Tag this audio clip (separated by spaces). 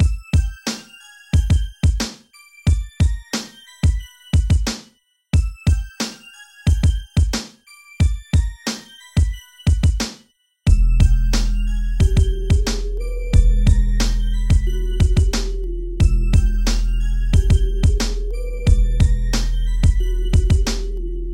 drum beat rap kick decent Hip-Hop music bells bass